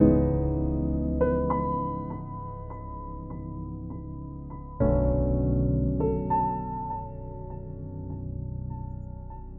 Slow Piano Chords with High Notes
100 BPM - A slow piano loop with chords, and a pseudo-echoing high note section. I'd love to see what you make!
chord, piano